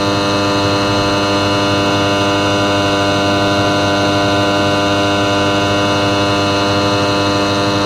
light hum102
loop, fridge, electric, hum, buzz, light